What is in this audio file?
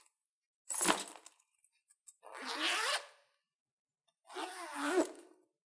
A sound I made for when the player exits enters and exits their inventory. Recorded using a ZoomH1 and edited in Adobe Audition.